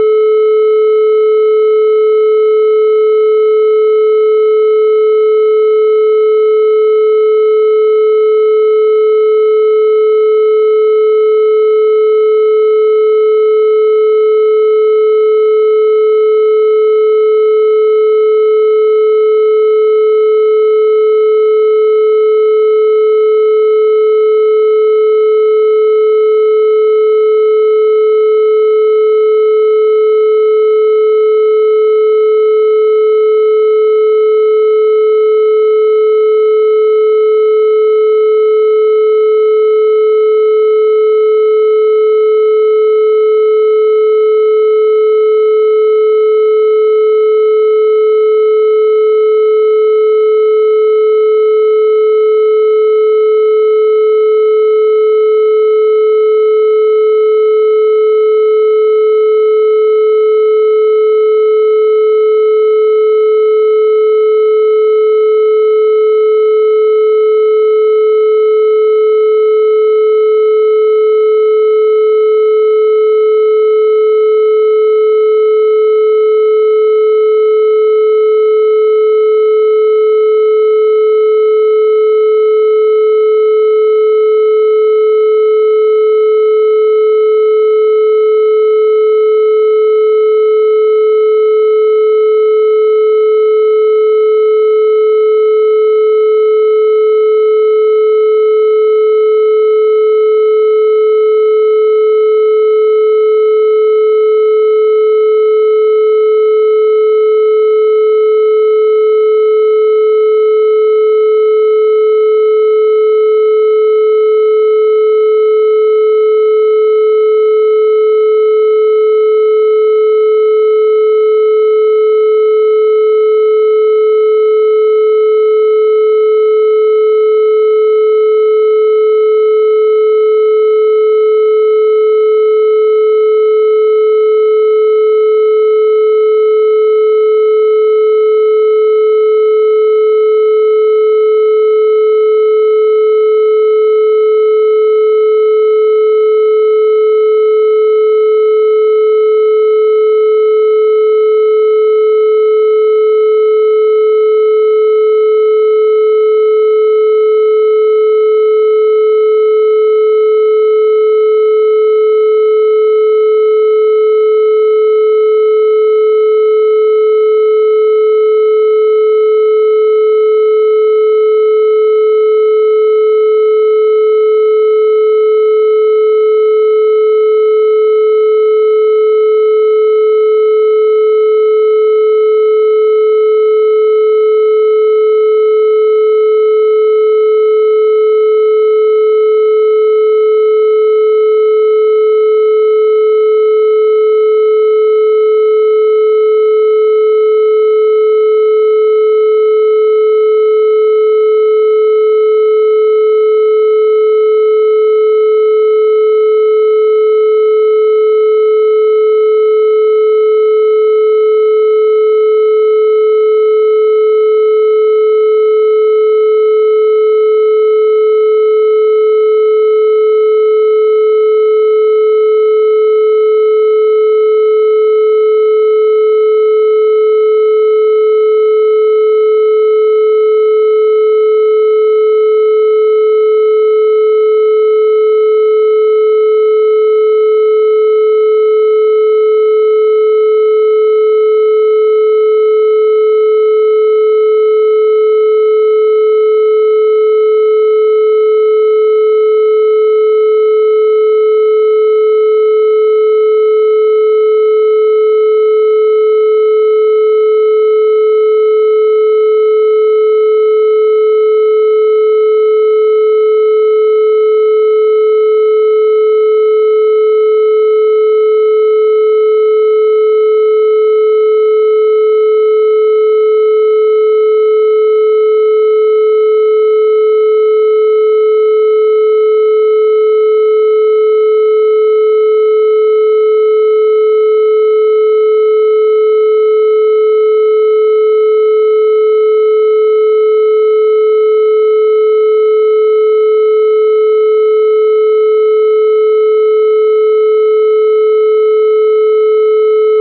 432Hz Triangle Wave - 5 minutes
432Hz Solfeggio Frequency - Pure Sine Wave
May be someone will find it useful as part of their creative work :)
frequency, kHz, tone, Hz, Pythagoras, gregorian, solfeggio